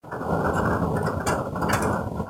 short dense afternoon thunderstorm in the city, with rain on the metal roof, recorded by Huawei phone, inside of the room. Natural fade in, added a small fade out. (more storm the second piece i uploaded.)
Recording Date: 20.06.2019
summer, city, thunder, afternoon, roof, wet, water, hail, storm, tempest, room, rain, metal, burst, thunderstorm, dense, outside, squall, intense, field-recording